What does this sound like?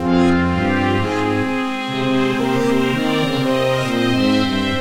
fake orchestral winning song !!
orchestral, trompette